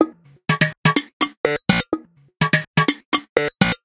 BuzzyPercussion 125bpm02 LoopCache AbstractPercussion
Abstract Percussion Loop made from field recorded found sounds
Percussion, Abstract